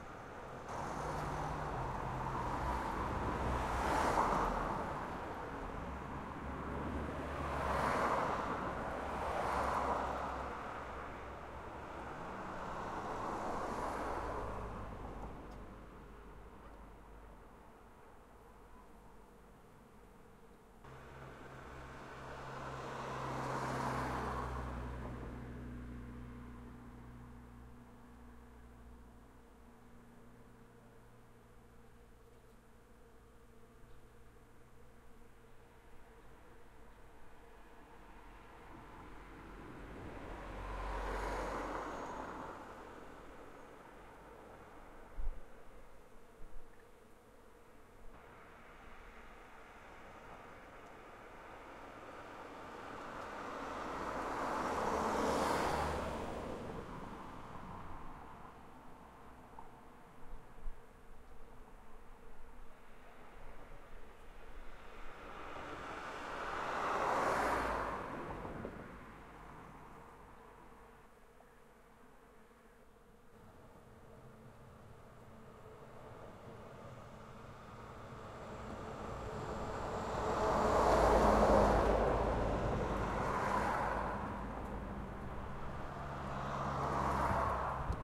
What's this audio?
Zoom H5 mid-distance pass-by recording